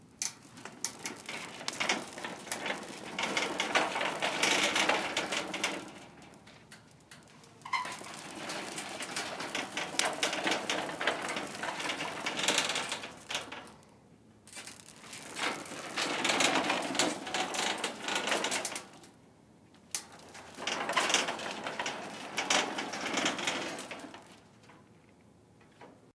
Shopping Cart 1
Sounds of a grocery cart wheeling on concrete.
cart, grocery, shopping, squeaking, wheels